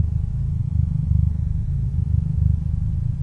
Cat MotordOoubL,R
Natural cat motor of house cat that has been doubled by a technique mixing 2 samples one sample the guide and the other ( preceding or proceeding sample ) as the Dub. It is real doubling.
One sample pan hard left the other hard right .
Cat-Motor, Doubled-signal, emulated-synth-sample